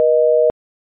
busy signal us
Busy signal on north-american telephony. Made with Audacity.
busy, communications, dial, dtmf, phone, signal, telephone, telephony, tone, united-states, us, usa